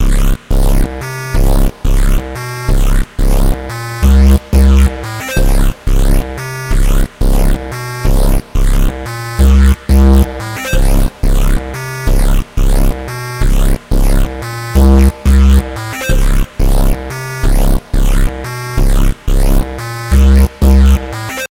Square hard bass =D distortion here works very well.
weird, bass, distortion, square